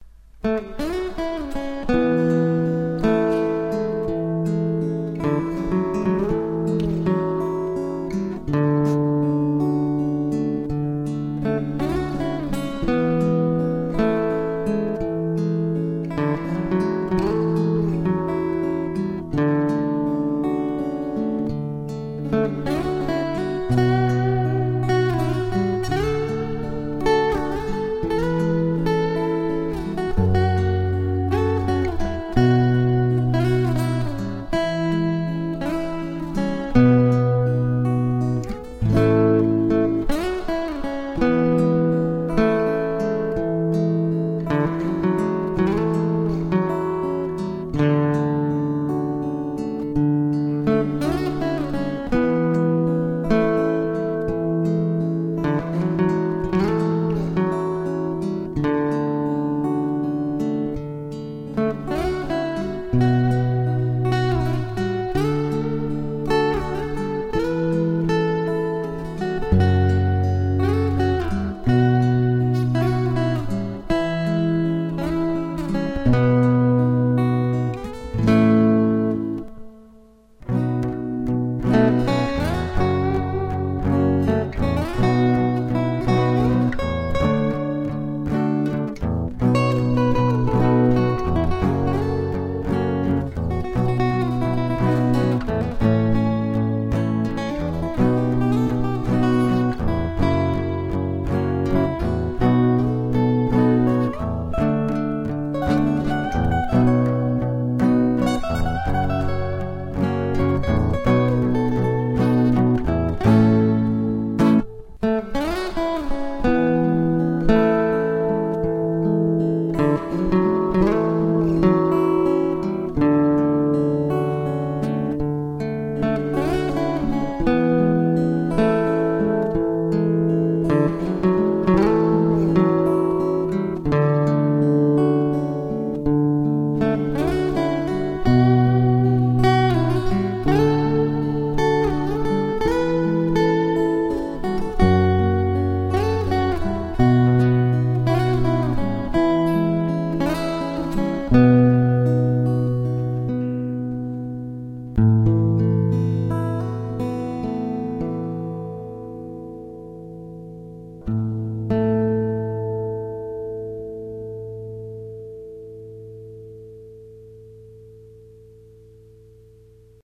Instrumental composition, rythm and solo guitar. Slow and melodical guitar sound.
Playd by surplus